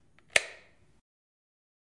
switch pressing button click